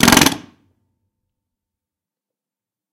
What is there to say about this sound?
Pneumatic chipping hammer - Holman nc4 - Start 1
Holman nc4 pneumatic chipping hammer started once.
1bar, 80bpm, air-pressure, chipping, crafts, hammer, holman, labor, metalwork, motor, pneumatic, pneumatic-tools, tools, work